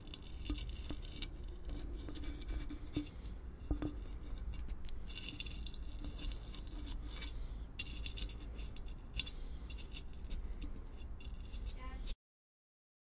OWI Putting out cigarette
The sound of a cigarette being put out
on a tin can
lungs, ash, cigarette, fire, smoking, smoke, OWI